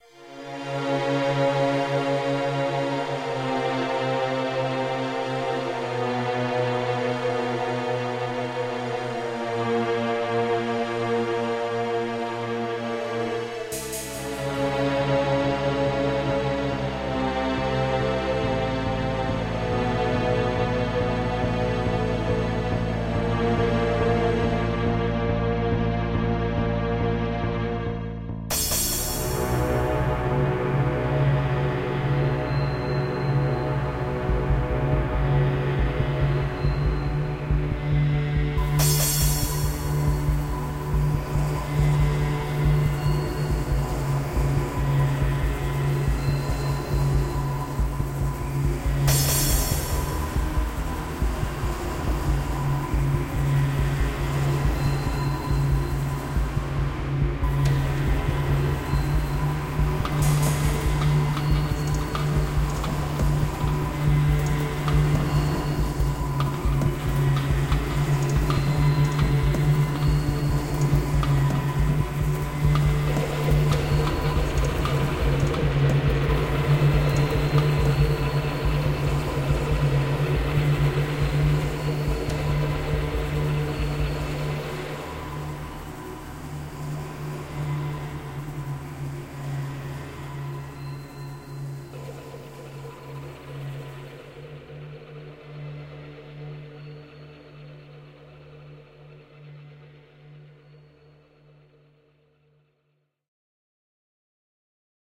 A dark atmospheric beginning with strings, slow speed.
strings, atmospherics, atmosphere, dark, mystic